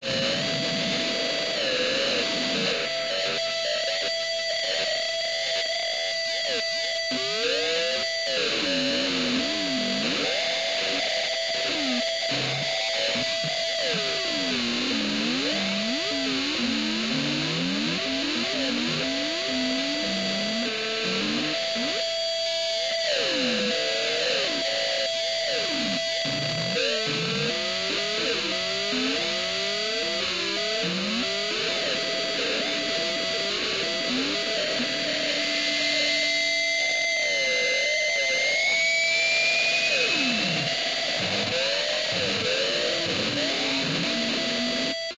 Sunshine sugar (stereo guitar feedback)
Stereo feedback from an electric guitar through a Fender 75 amplifier while playing around with a delay pedal. Originally appears in the outro of 'Sunshine sugar' off the 'Lines EP'.
delay, distortion, effect, electric-guitar, feedback, noise, sound, stereo